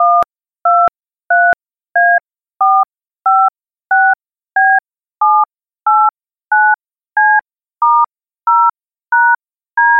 Dual tone multi-frequency (DTMF) dialing 1-2-3-A-4-5-6-B-7-8-9-C-*-0-#-D in this order. Made with Audacity.